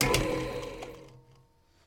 Powering the drill on, and immediately off again, getting the brief burst of sound